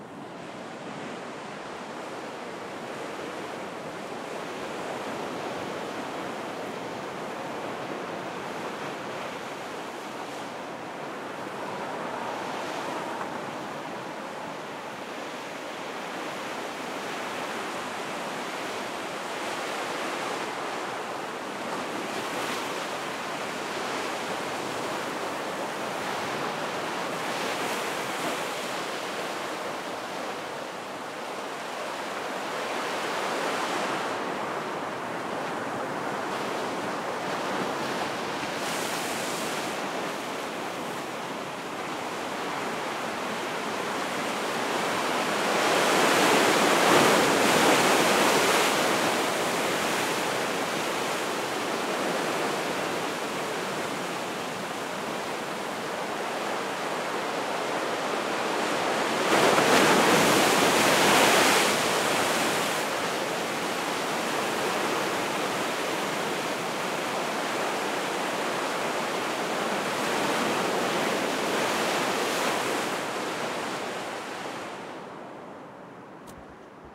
sea beating rocks
MONO reccorded with Sennheiser 416